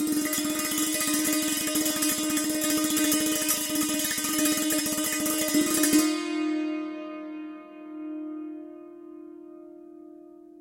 sant-roll-D#4
recordings of an indian santoor, especially rolls plaid on single notes; pitch is indicated in file name, recorded using multiple K&K; contact microphones
acoustic, percussion, santoor